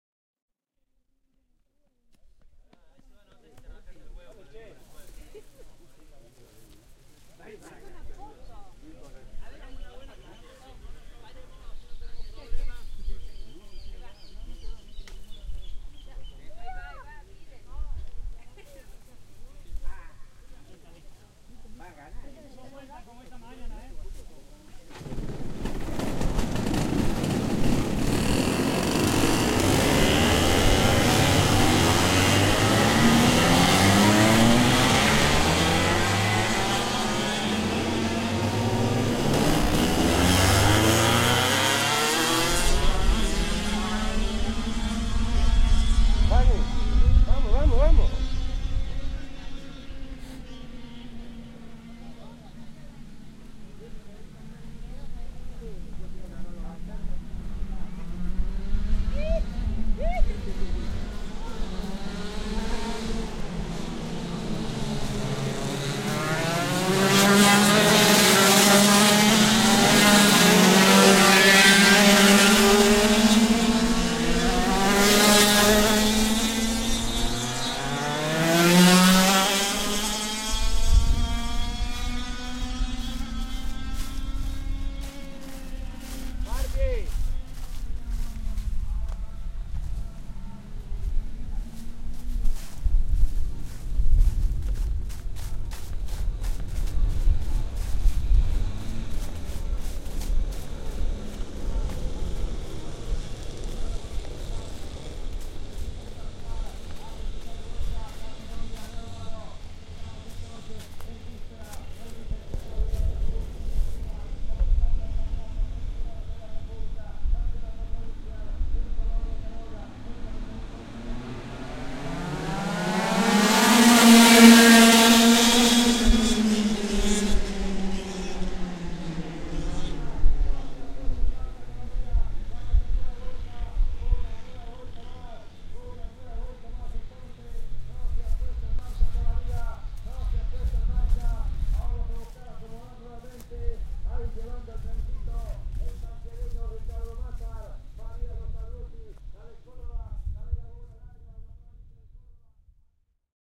Karting.CCaroya.Mayo2010.2Race.061.1rstJumpStart
125Kph/78MPH,18.000rpm
race, field-recording, racing, go-karts, circuito-valentin-lauret, sound, karting, argentina, broadcasting, birds, colonia-caroya, footsteps, voice, engine, accelerating, revving, nature, wind, zoomh4, outdoors, noise, cordoba